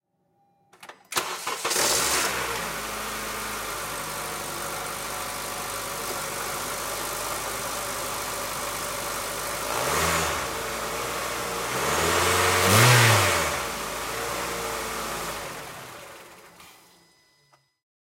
2012 Honda civic engine start and rev